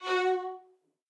One-shot from Versilian Studios Chamber Orchestra 2: Community Edition sampling project.
Instrument family: Strings
Instrument: Violin Section
Articulation: spiccato
Note: F4
Midi note: 66
Midi velocity (center): 95
Microphone: 2x Rode NT1-A spaced pair, Royer R-101 close
Performer: Lily Lyons, Meitar Forkosh, Brendan Klippel, Sadie Currey, Rosy Timms